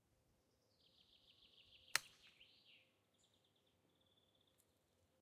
HSN golf pitch
Golf hit with a wedge.